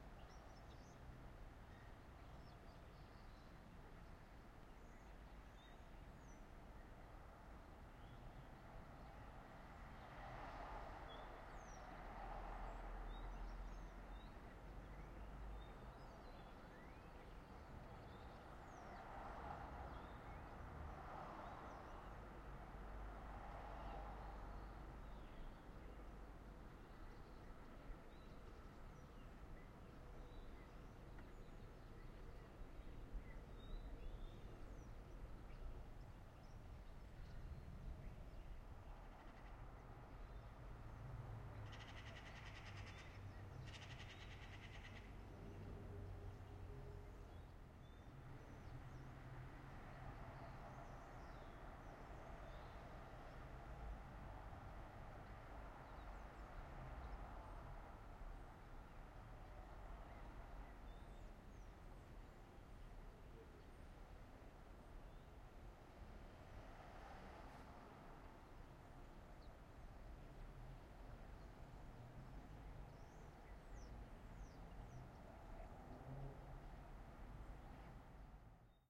Room Tone Open Window Quiet
Garden, Residential, Room, Tone